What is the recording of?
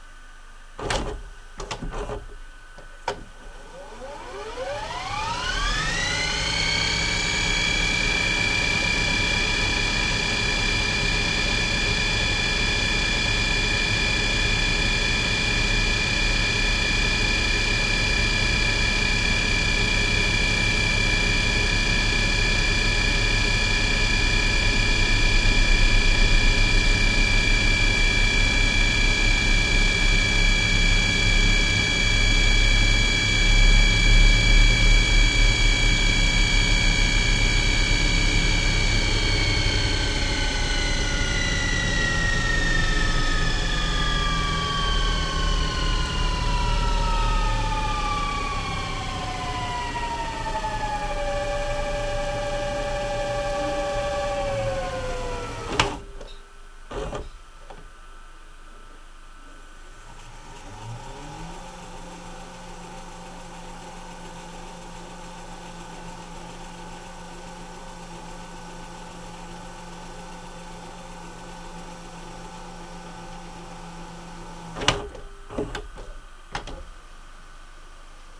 Firstline VCR-601 rewinding a 3 hours tape. Recorded with Audacity using a Samson Meteor mic.
fast-forward, old, rew, rewind, tape, videocassette